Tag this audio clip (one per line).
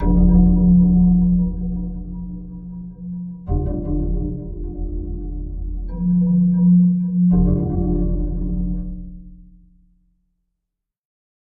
model
frequency
resonant
physical
string